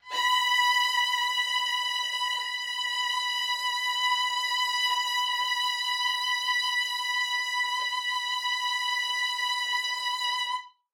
One-shot from Versilian Studios Chamber Orchestra 2: Community Edition sampling project.
Instrument family: Strings
Instrument: Viola Section
Articulation: vibrato sustain
Note: B5
Midi note: 83
Midi velocity (center): 95
Microphone: 2x Rode NT1-A spaced pair, sE2200aII close
Performer: Brendan Klippel, Jenny Frantz, Dan Lay, Gerson Martinez